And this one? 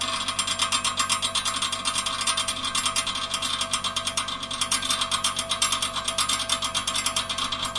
toaster on fridge
A toaster stimulated by the fridge it's standing on. The metal blades inside tickle against each other.
Recorded with Tascam DR-07MKII, hum and noise from the fridge removed and normalized with CoolEditPro.